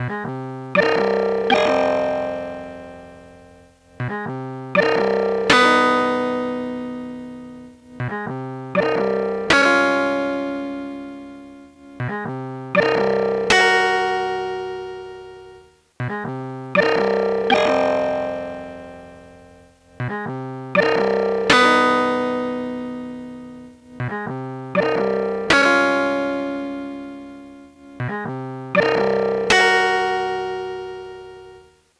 A kind of loop or something like, recorded from broken Medeli M30 synth, warped in Ableton.
lo-fi, motion, broken, loop